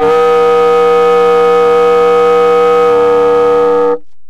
Tenor Sax Multiphonic g4
The format is ready to use in sampletank but obviously can be imported to other samplers. The collection includes multiple articulations for a realistic performance.
jazz, sampled-instruments, sax, saxophone, tenor-sax, vst, woodwind